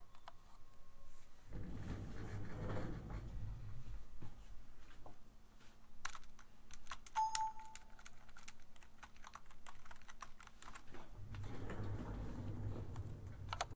025 House StudioAmbient
studio ambient sound
studio, house